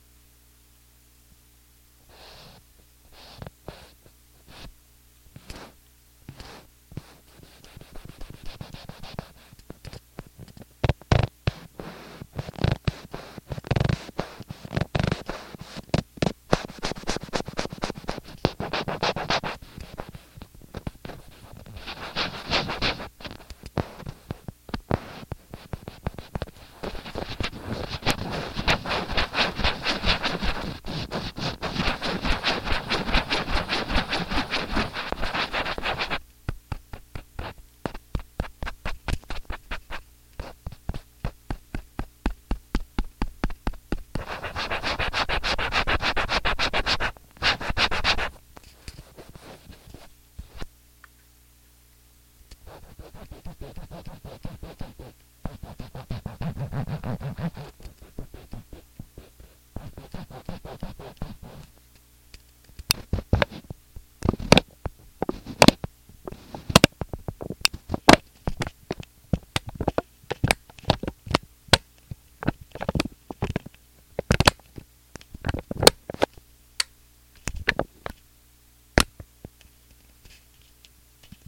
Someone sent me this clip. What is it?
touching a paper cup